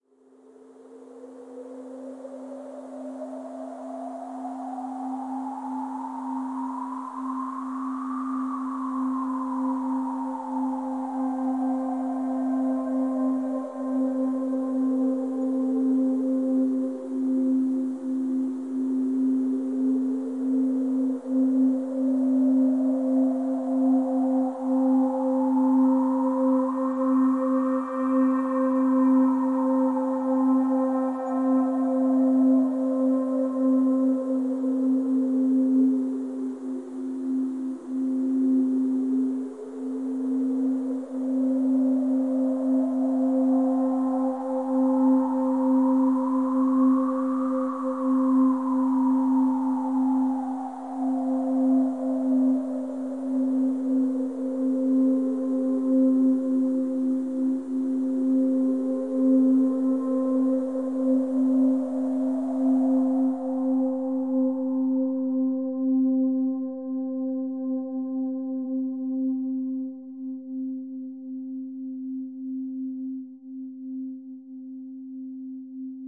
Drone Made with Ableton